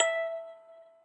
metal cracktoy crank-toy toy childs-toy musicbox
childs-toy, metal, cracktoy, toy